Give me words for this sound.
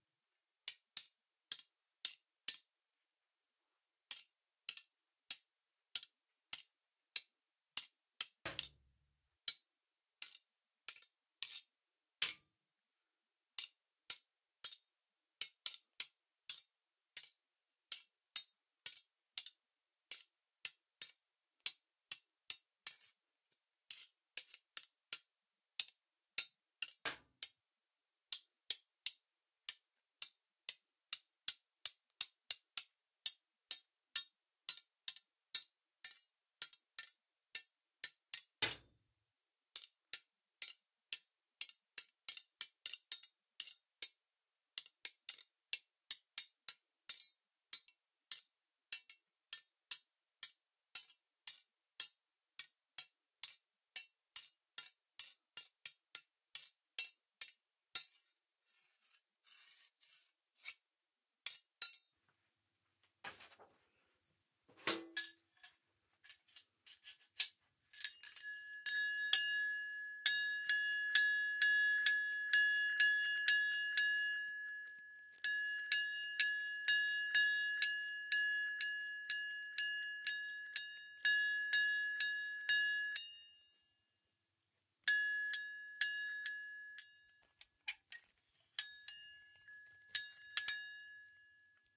METAL SFX & FOLEY, iregulare and faster Light metal taps and rattles
Some metal based sounds that we have recorded in the Digital Mixes studio in North Thailand that we are preparing for our sound database but thought we would share them with everyone. Hope you like them and find them useful.
rattle, Ed, Alex, tap, Mixes, Sheffield, Digital, Boyesen, metal, scrape